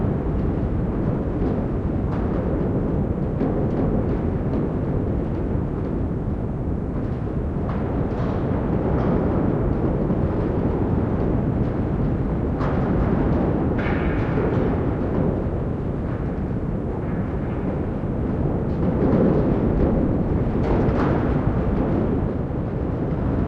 A windy elevator ride with rocks reverberating on the frame as they clatter along the sides.